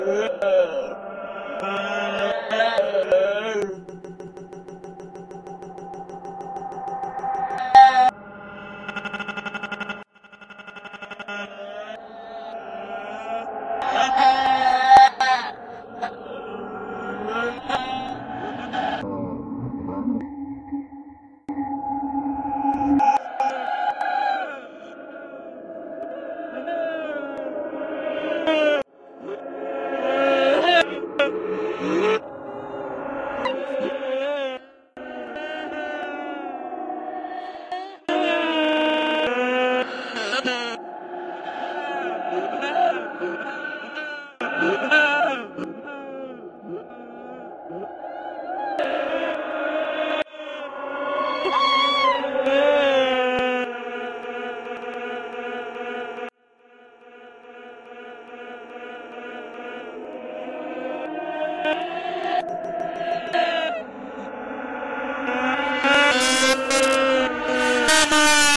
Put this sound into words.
glitched crying
creepy, crying, glitched